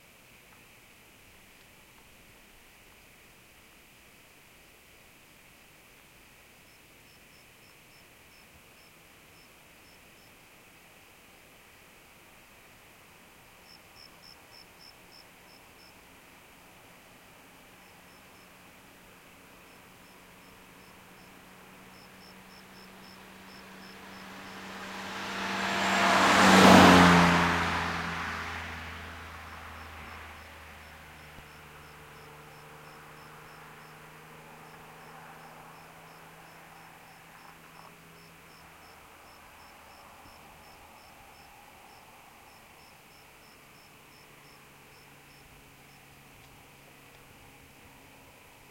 Car Fly by
A BMW driving fast on a country road at night. Coming from a long distance, and zooming past.
auto, BMW, traffic